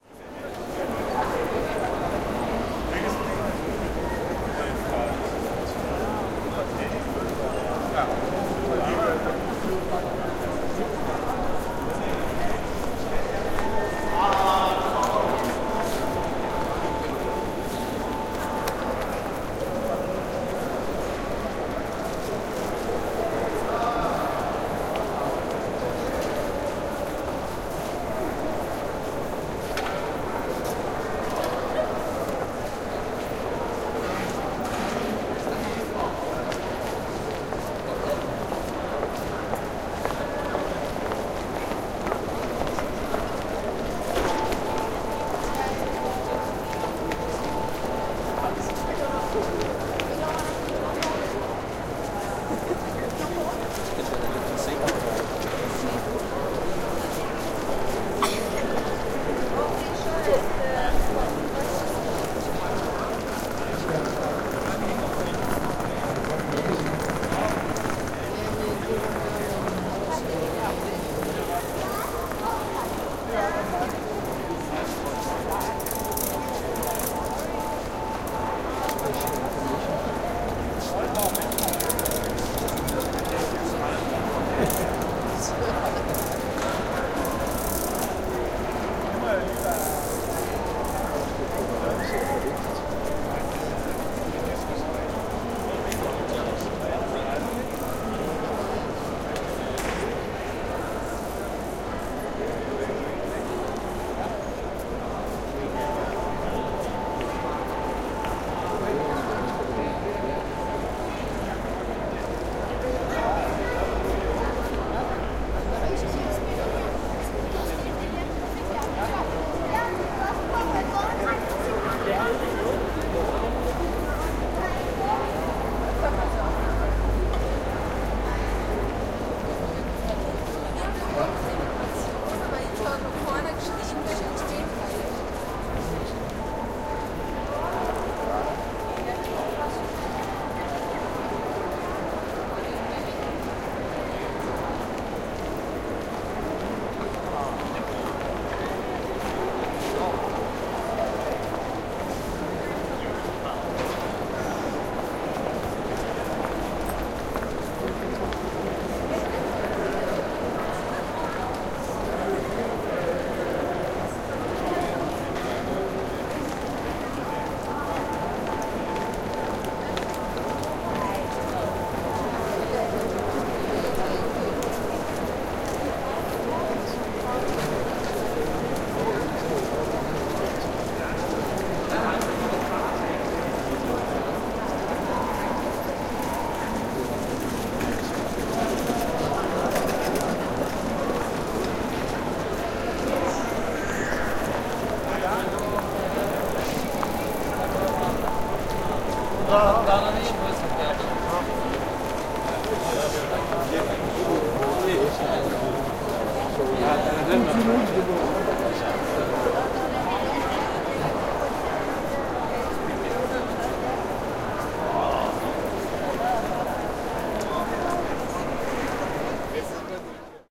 From a bench in the main hall of the central station in Copenhagen. announcements and people passing suitcases rolling bicycles ...